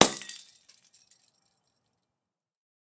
Lightbulb Break 3
A lightbulb being dropped and broken.
bulb, glass, break